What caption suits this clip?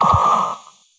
Shooting Sounds 039
futuristic, gun, laser, shoot, shooting, weapon